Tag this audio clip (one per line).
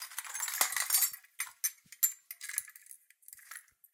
breaking
broken
debris
glass
shatter
smash
smashing